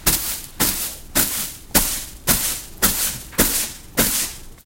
Straw Broom Sweeping Hard
Sweeping the floor with a straw classic broom hard
sweep, broom